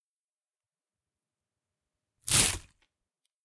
S Tear Cardboard
tearing a piece of cardboard
present, tear, box, cardboard